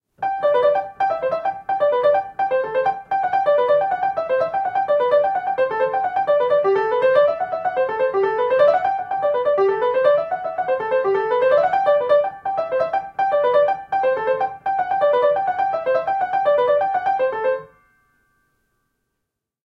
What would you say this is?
Raw audio of the opening to J.S.Bach's "Fantasia in G Major" played by myself on a baby grand piano. I recorded this simultaneously with the Zoom H1, Zoom H4n Pro and Zoom H6 (Mid-Side capsule) to compare the quality. The recorders were about a meter away from the piano.
An example of how you might credit is by putting this in the description/credits:
The sound was recorded using a "H4n Pro Zoom recorder" on 17th November 2017.